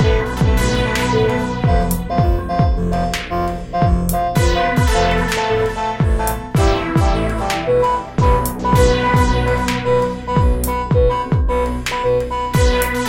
This loop has been created using the program Live Ableton 5 and someof the instruments used for the realization Usb Sonic Boom Box severalsyntesizer several and drums Vapor Synthesizer Octopus Synthesizer WiredSampler Krypt electronic drum sequencer reaktor xt2 Several Synt diGarageband 3